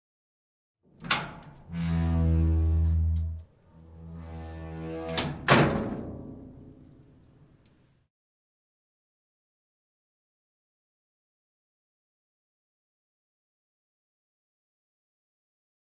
metal door
door, metal, opening, closing
closing, door, metal, opening